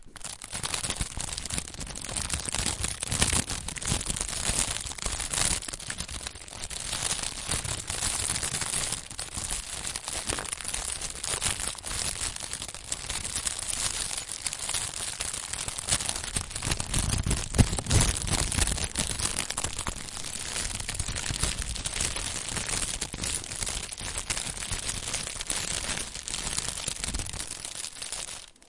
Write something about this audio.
Queneau plastique
froissement d'une feuille de plastique
bag, compact, plastic